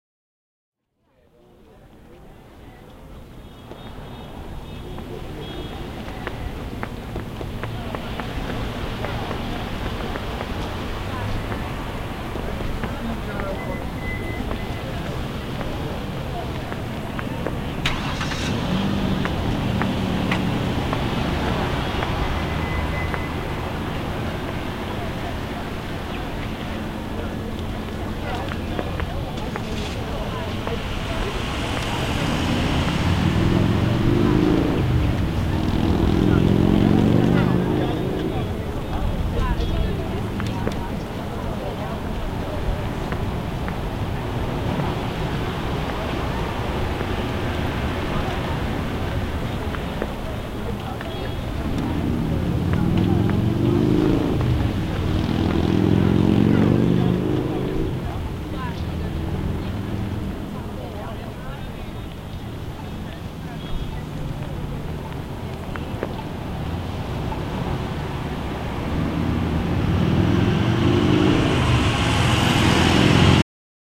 City Noises
This clip is a recording of bustling city sidewalk. The sounds of people talking were recorded on a bus, on a university campus, and in the city of Syracuse. the sounds of cars and trucks passing by were also recorded in the city of Syracuse. The sounds were then piled on top of each other, duplicated, and enter through different speakers to achieve a busier and more bustling atmosphere.
FND112, f13